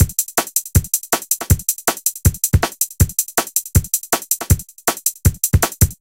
SMG Loop Drum Kit 1 Mixed 160 BPM 0097
160-BPM, drumloop, kick-hat-snare